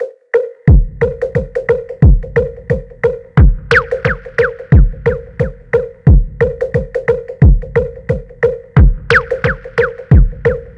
abstract-electrofunkbreakbeats 089bpm-dubimal
this pack contain some electrofunk breakbeats sequenced with various drum machines, further processing in editor, tempo (labeled with the file-name) range from 70 to 178 bpm, (acidized wave files)
this is a simple dub beat
chill, delay, drum-machine, dub, loop, processed, reggae